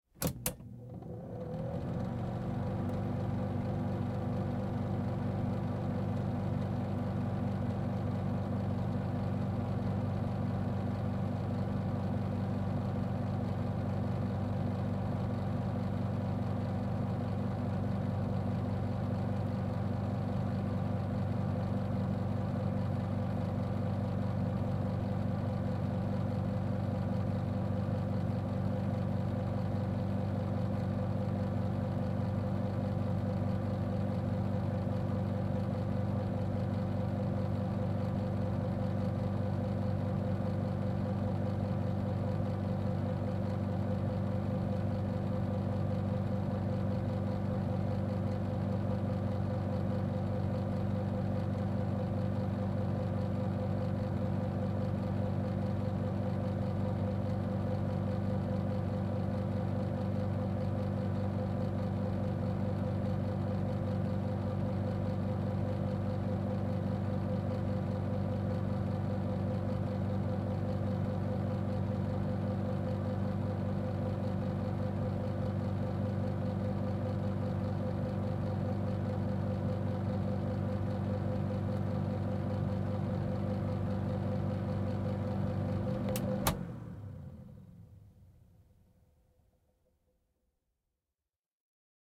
Overhead Projector On Run Off Close
Overhead projector a few inches away from the exhaust fan.
fan, overhead, projector